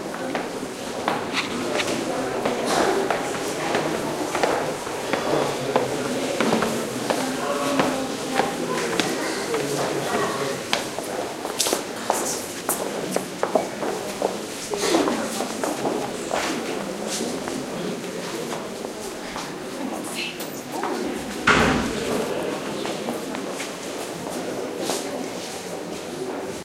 20100404.Brussels.Museum.interior
short take of museum ambiance, with soft talking and footsteps. Recorded inside Royal Museum of Fine Arts, Brussels. Olympus LS10 internal mics
brussels, field-recording, footsteps, museum